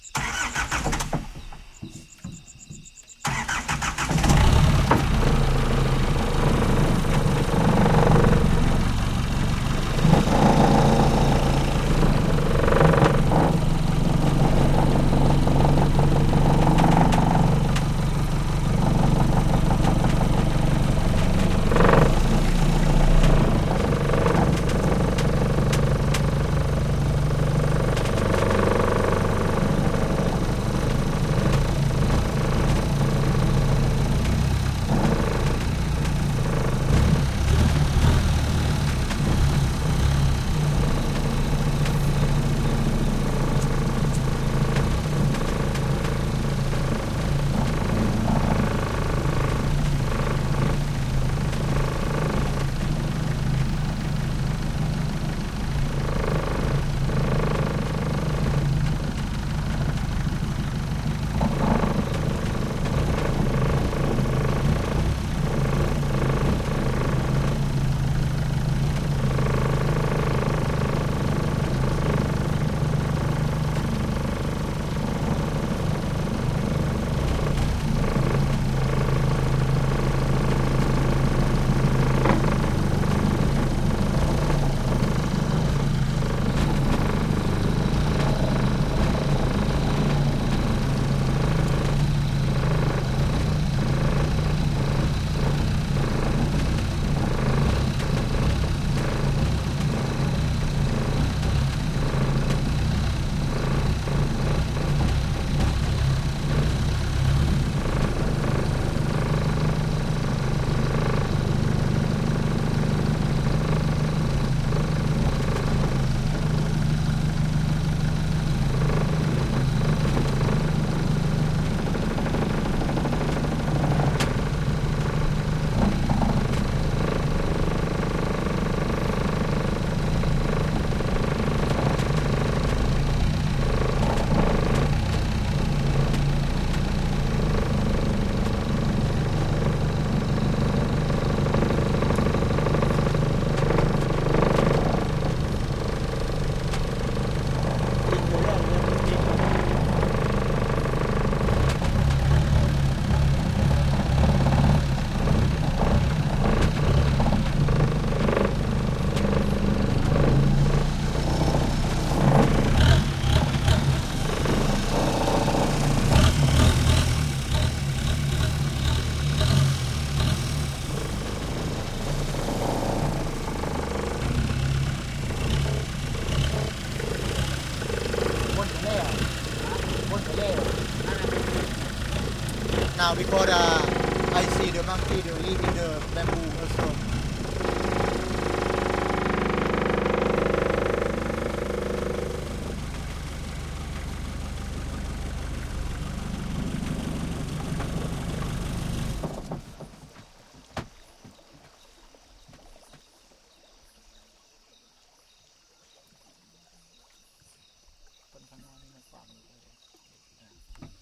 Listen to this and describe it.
Thailand longboat rattly motor on board false start, then start, drive at various speeds, shut off LEFT SIDE OFFMIC RIGHT SIDE ONMIC
boat, field-recording, longboat, motor, onboard, Thailand